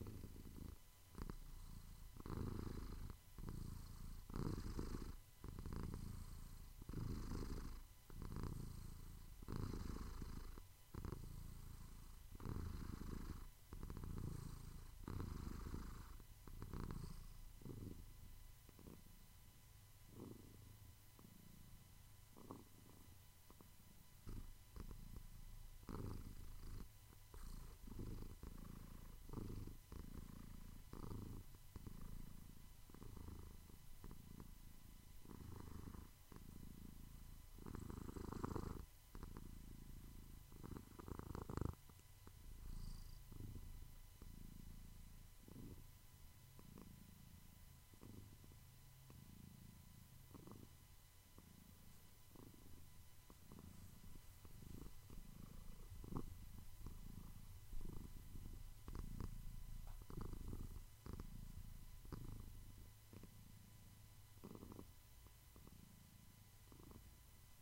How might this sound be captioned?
purring
Sound Quality: 3 Volume: 4
Recorded at 21/03/2020 16:10:58
animal, animals, cat, cats, domestic, pet, pets, purr, purring